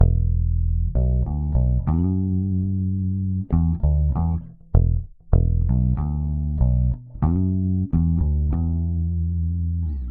a little funky hip hop bass